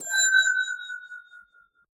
down-bell
an altered bell sound from my music piece